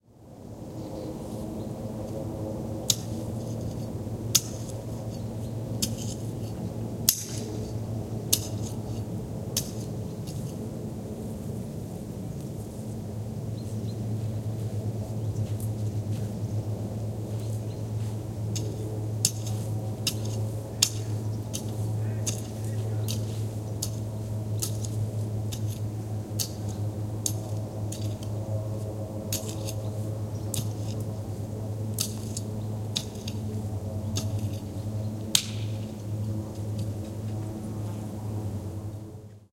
20220215.digging.under.01
Someone digs, with overheading helicopter noise. Matched Stereo Pair (Clippy XLR, by FEL Communications Ltd) into Sound Devices Mixpre-3
aircraft, army, digging, engine, field-recording, helicopter, military, trench, war